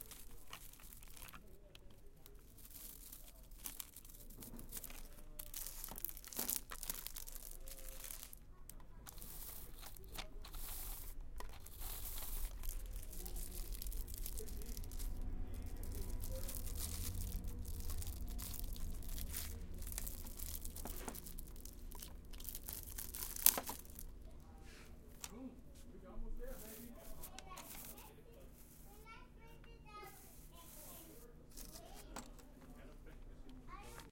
brushing brushes against stuff at ace hardware
not the best recording, the plastic is more interesting.
one in a series of field recordings from a hardware store (ACE in palo alto). taken with a tascam DR-05.
plastic-wrap, bristle, stiff-brushes, pop, hardware-store